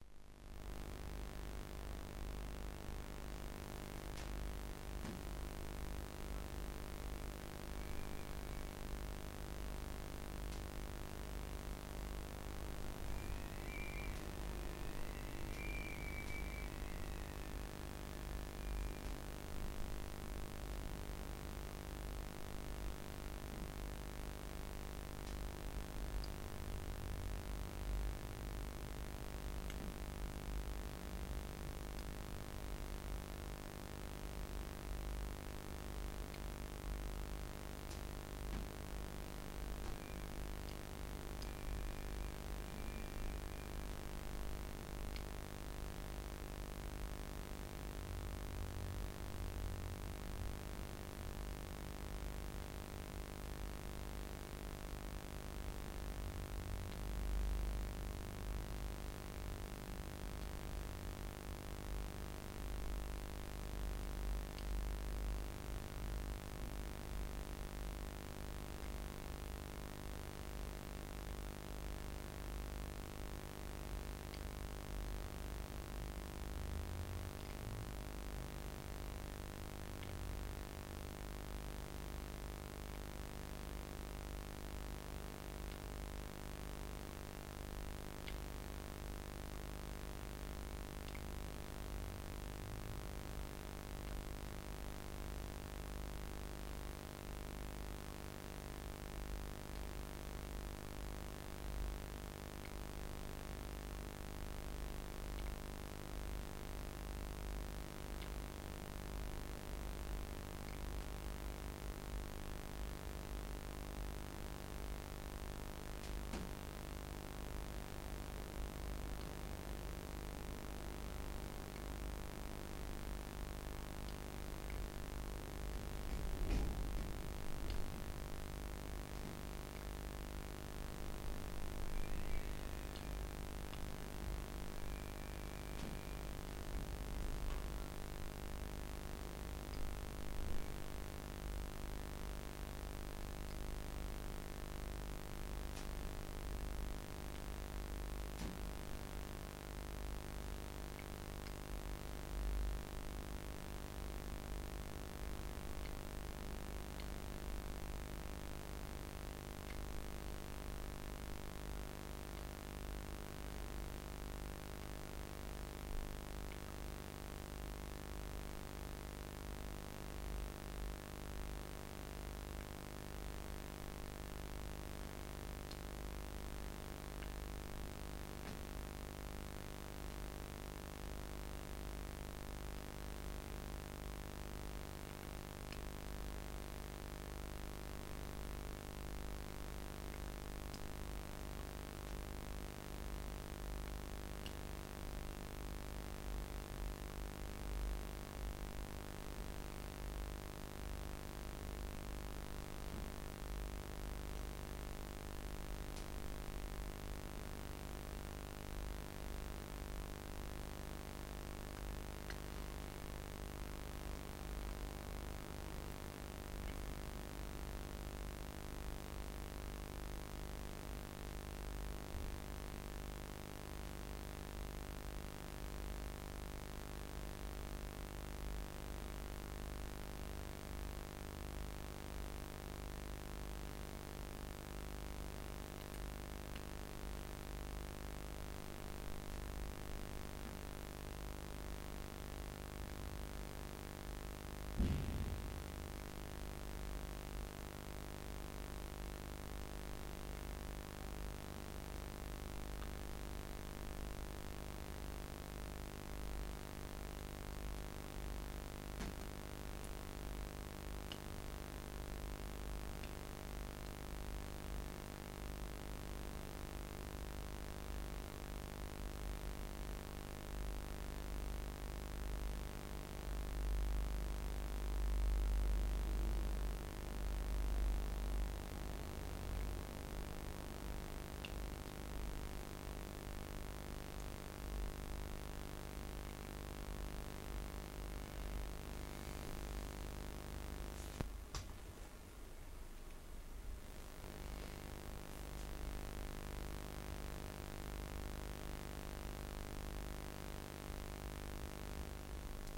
ECU-(A-XX)199 phase1
ECU UTV ATV 16V Trail Path Channel Wideband Broadband Battery Jitter Atmospheric Reluctor SOx COx NOx MCV Dual Carb Optical Iso Synchronous Fraser Lens Beam T2 T1xorT2 ECM PCM Link
Battery, Lens, Carb, ECM, COx, Channel, Dual, SOx, NOx, ECU, ATV, Optical, Jitter, UTV, Link, Broadband, Beam, MCV, PCM, Atmospheric, Fraser, T1xorT2, Synchronous, 16V, T2, Iso, Trail, Reluctor, Wideband, Path